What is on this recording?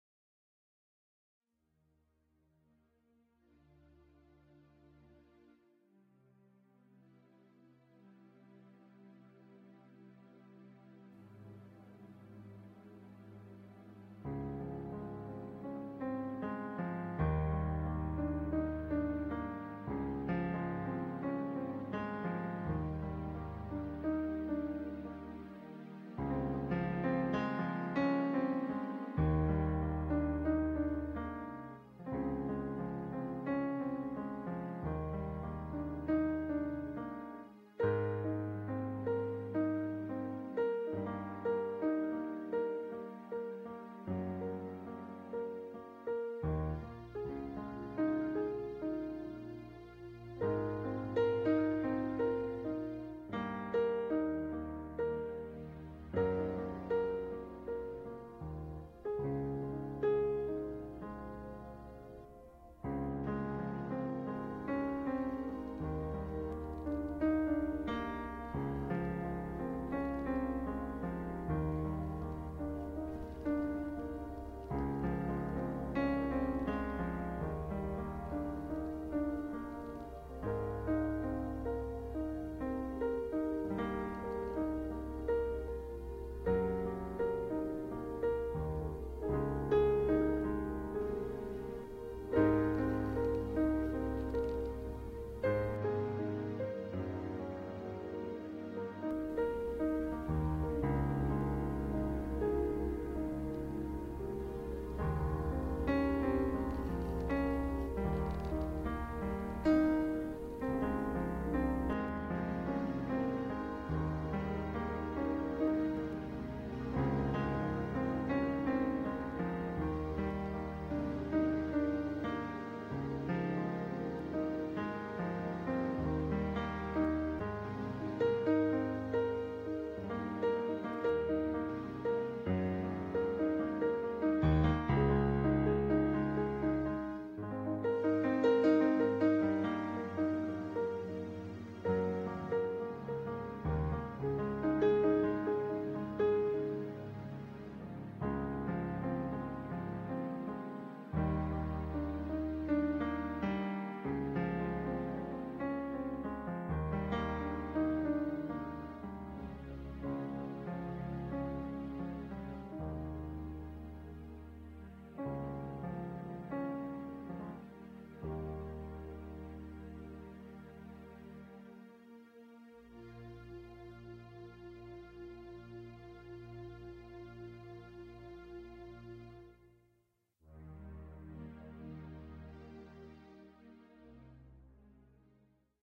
Rainy Day me.
Piano repeating motif over organ chorus through Audacity
Romantic, trailer, Wistful, interlude, movie, Romance, film, Cinema